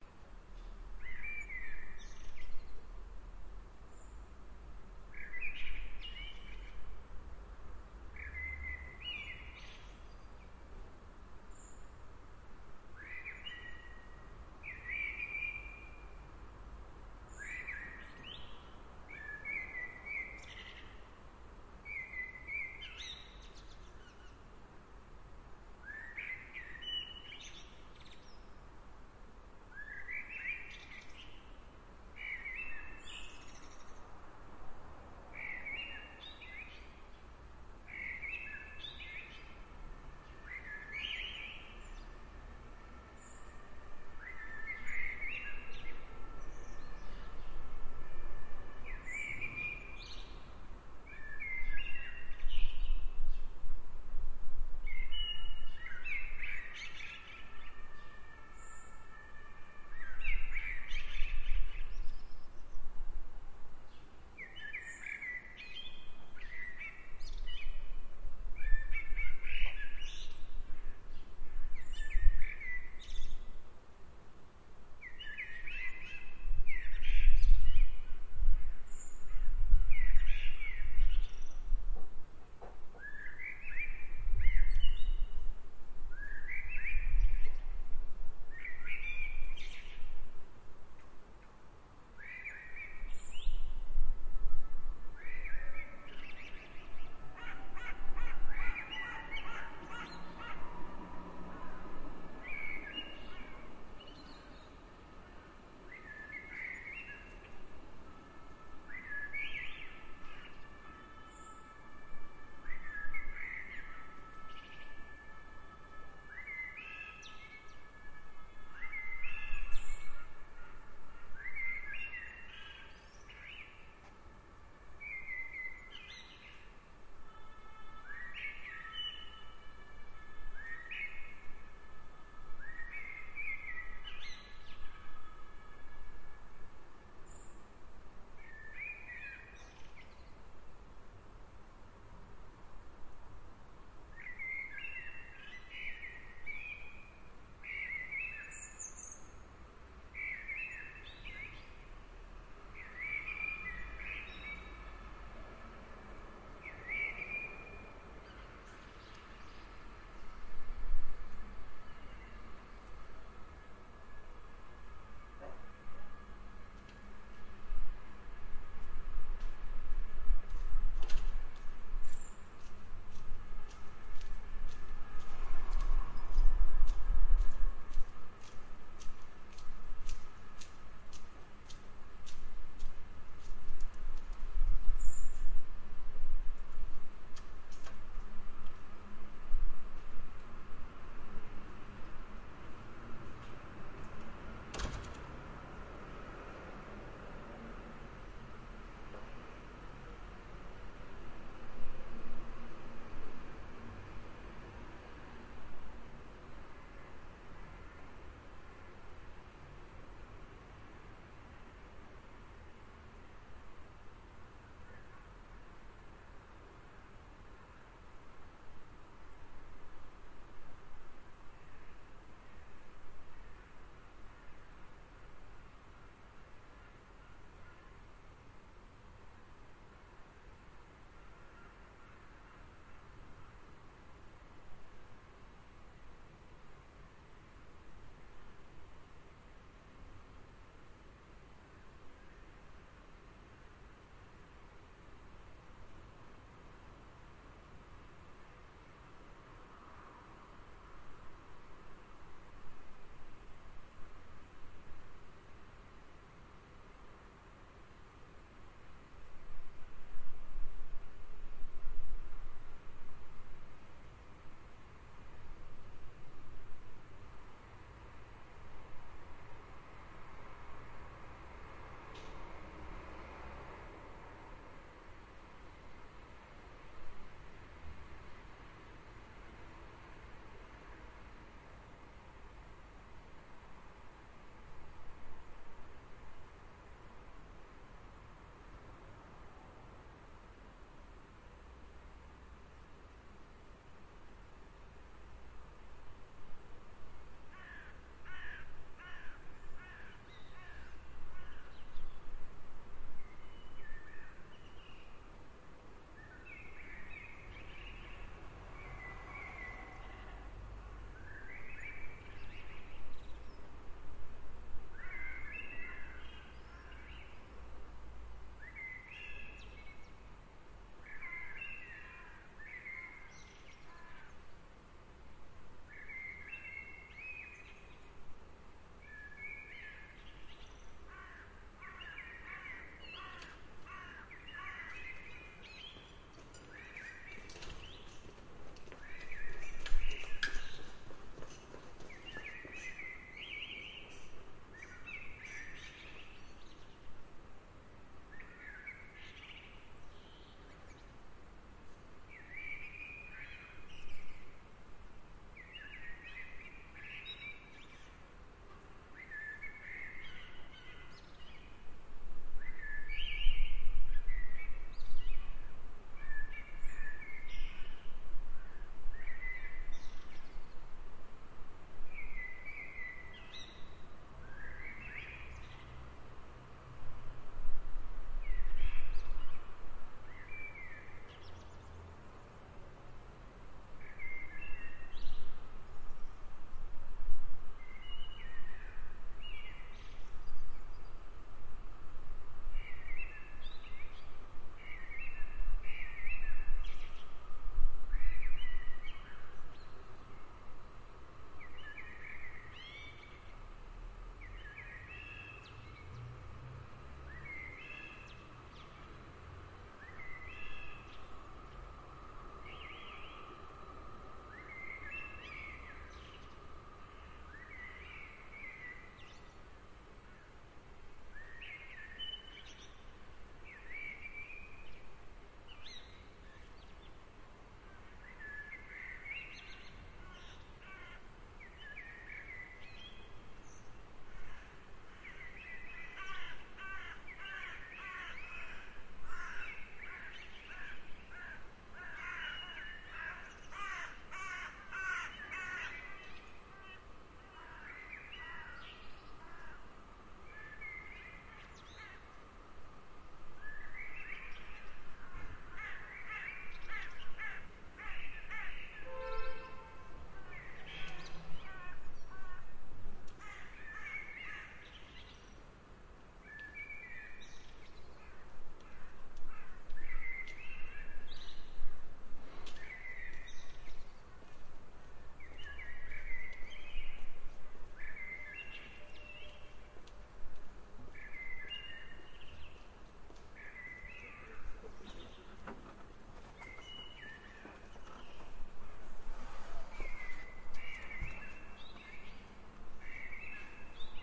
Nuture environment little City.
Aufgenommen 5 Uhr German Time
To, Have, myself, City, little, sound, fun, Nuture, Build, environment, great, even